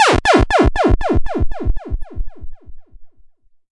Retro, Space Explosion Or Death Sound Effect
Retro, space explosion, or death sound effect!
This sound can for example be triggered when a target is destroyed - you name it!
If you enjoyed the sound, please STAR, COMMENT, SPREAD THE WORD!🗣 It really helps!
game, destroy, blow-up, blow, bomb, death, retro, boom, demolish, space, up, explode, destruct, detonate, explosion